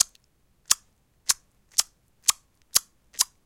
short attack "tic" sounds